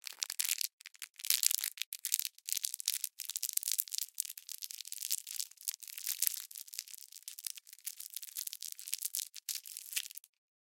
candy wrapper crinkle big B
a lot of crinkling a plastic candy wrapper with fingers.
wrapper, candy, crinkle